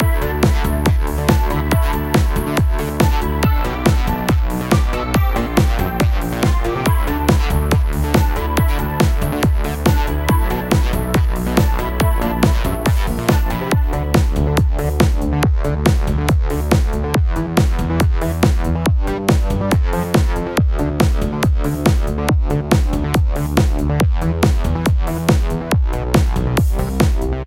A trance esque short loop with a snappy kick drum
funky, happy, loop, music, nice